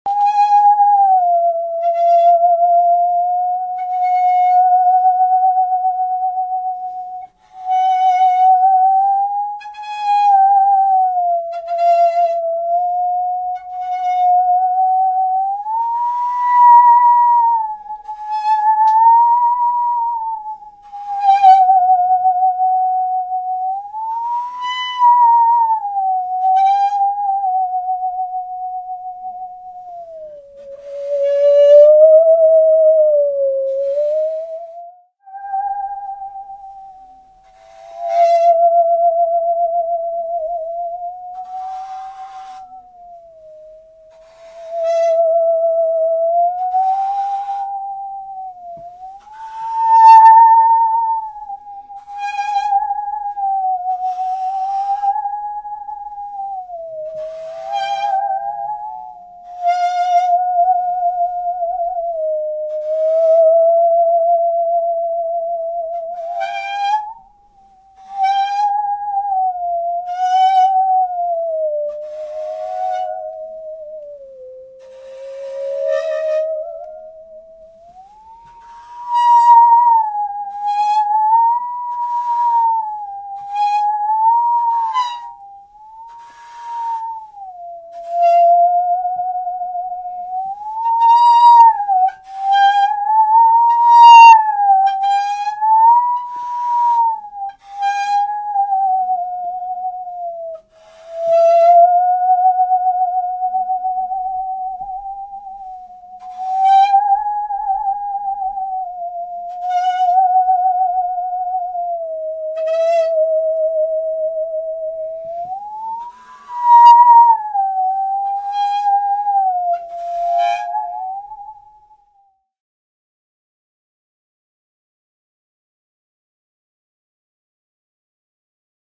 Jules' Musical Saw no voices
Here's the same Musical Saw but with some sound processing to edit out our voices.
saw, musical, edited, jules